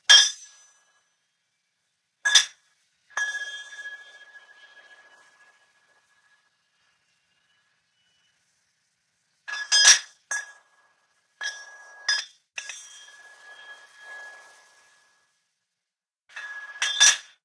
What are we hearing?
The sound of an (empty) missile head being hit against another during manufacturing. Cleaned up with iZotope RX7 Standard and Neutron 2.
Could be used for factory sound or something in a forge? Have fun with it!
ammunition, factory, industrial, metal, military, missile, steel, warfare, weapon